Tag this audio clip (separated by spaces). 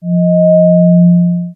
multisample metallic additive swell bass synthesis